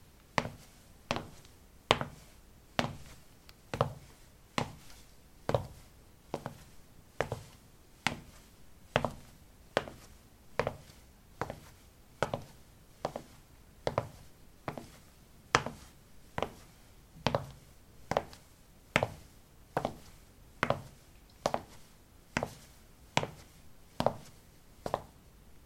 ceramic 10a startassneakers walk
Walking on ceramic tiles: low sneakers. Recorded with a ZOOM H2 in a bathroom of a house, normalized with Audacity.
steps, footstep, footsteps